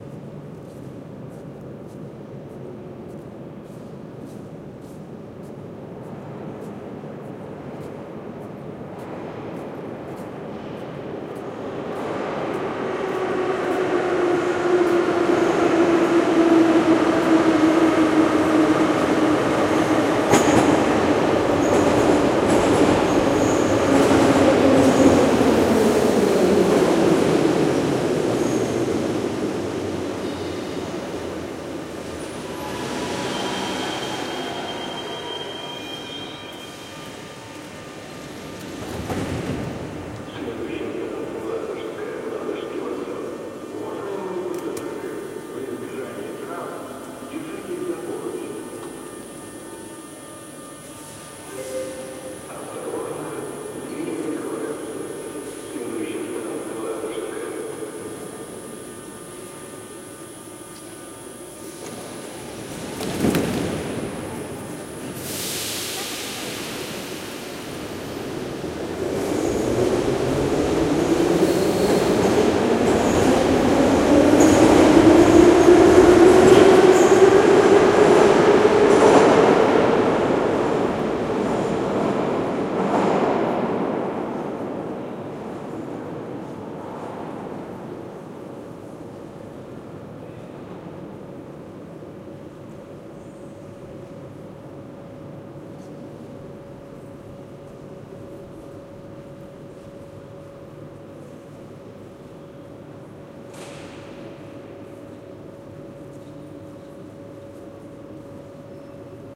Sounds arriving subway. Recorded in St. Petersburg, September 1, 2013
ambience, arrival, metro, train, transportation